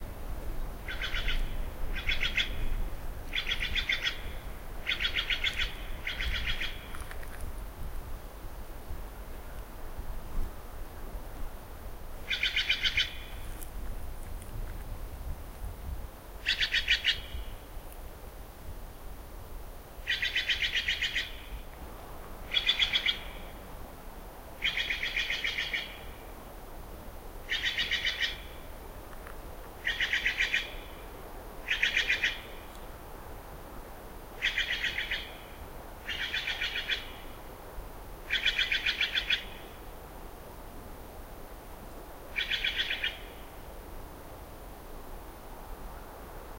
Short clip of steller's jay scolding in a forest. Wind in the trees in background. Recorded on an Olympus LS-14.
alarm-call, scolding